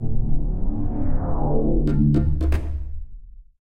Music effect in the case player loses the fight - classic 90s style video-game